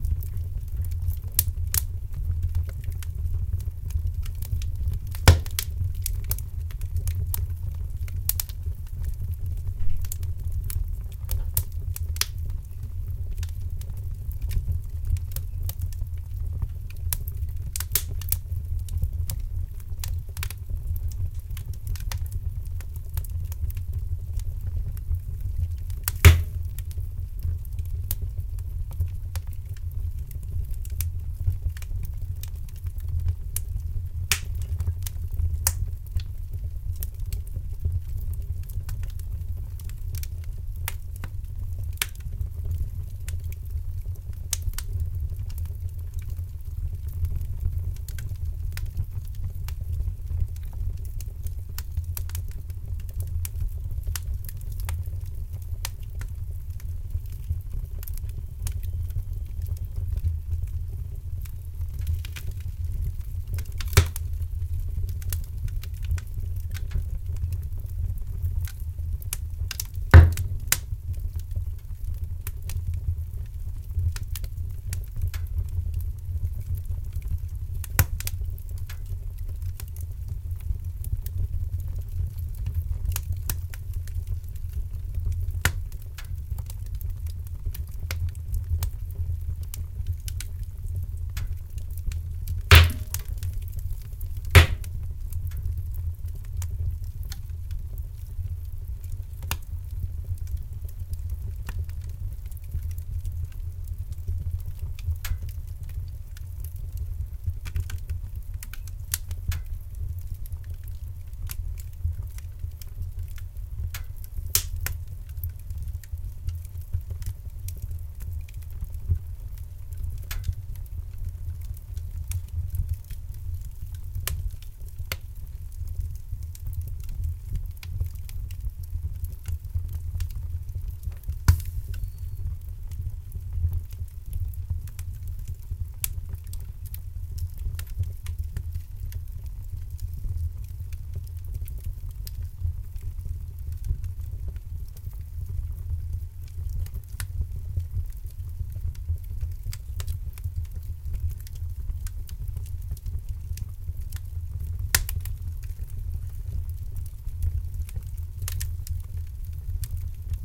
almost clean sound / dry
recorder As I remember on light semi profi microphone, little post production
recorded fire in hearth